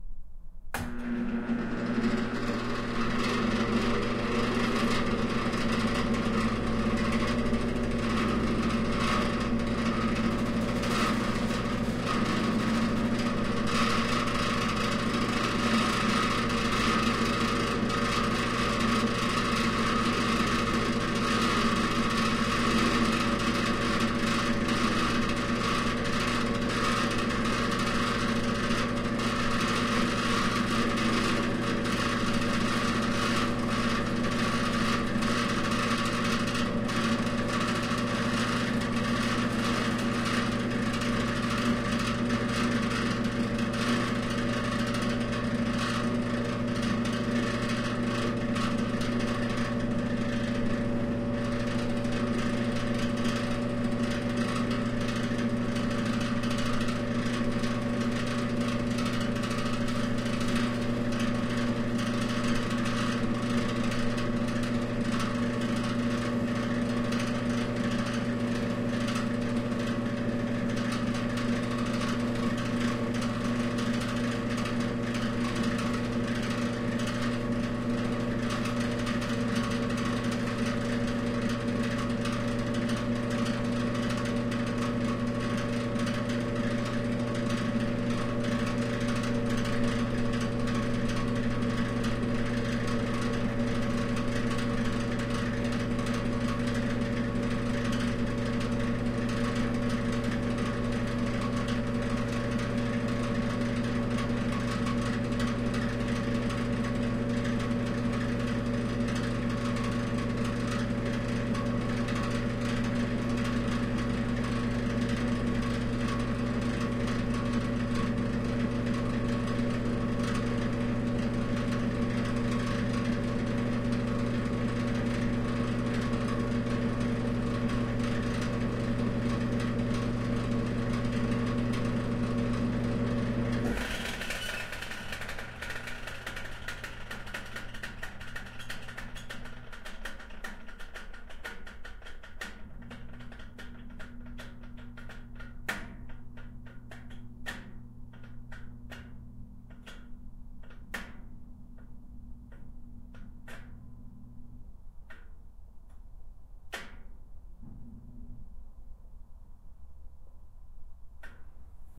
An old heater fan.